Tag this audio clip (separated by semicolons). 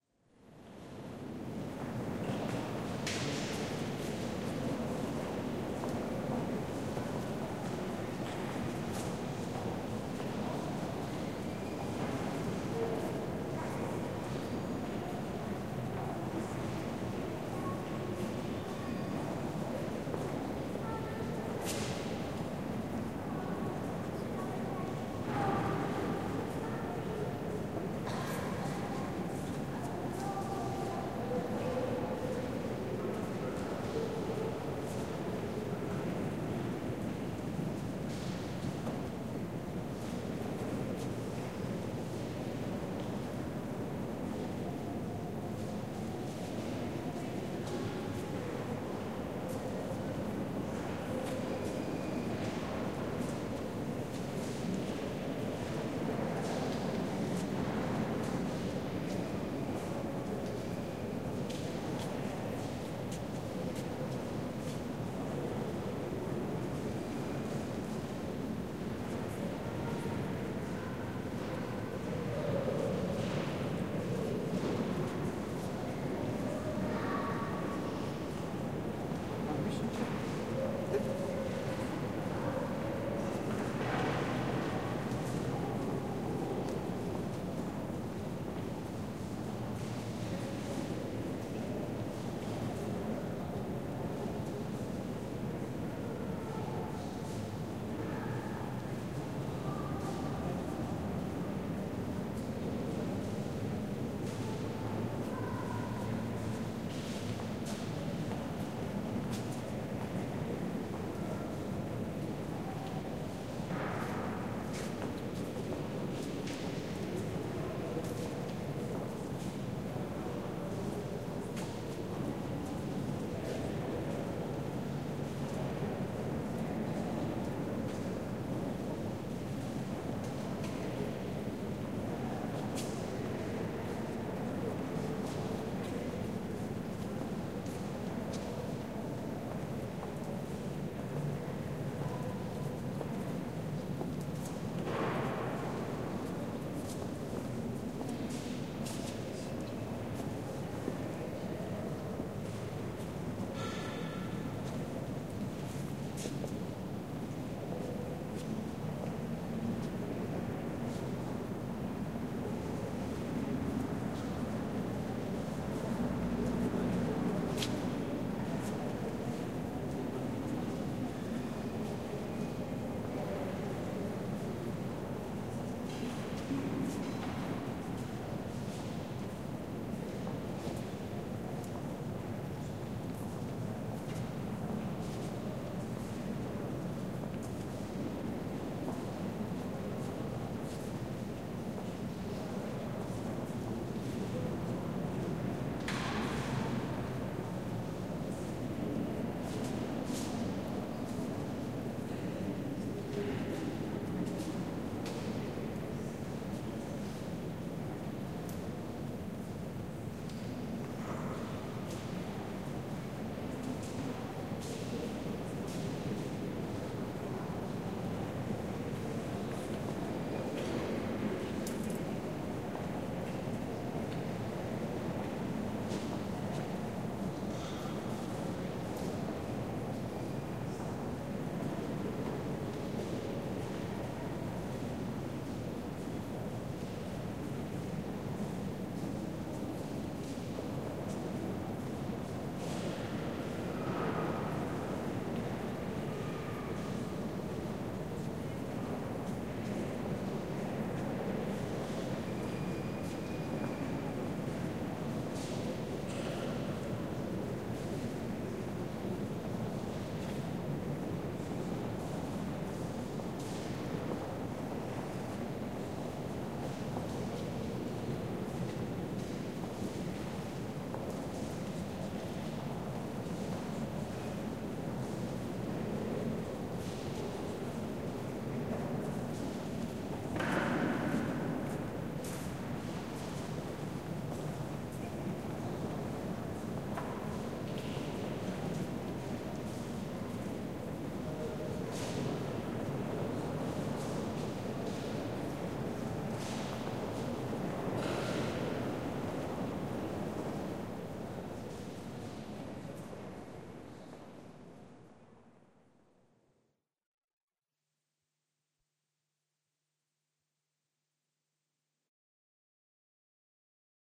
reverberation; people; church; cathedral; busy; ambience; footsteps